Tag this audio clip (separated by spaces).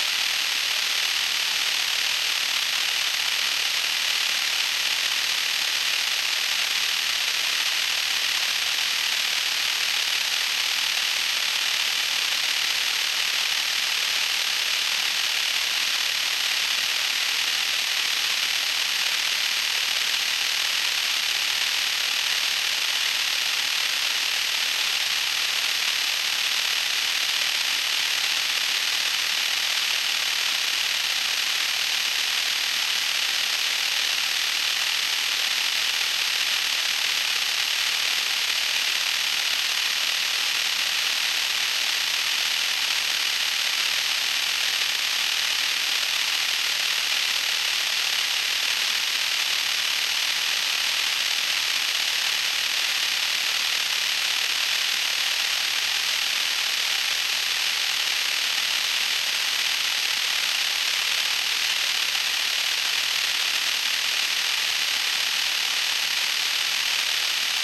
loop noise glitch